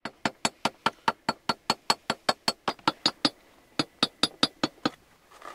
tap hammer small piece of metal gentle hits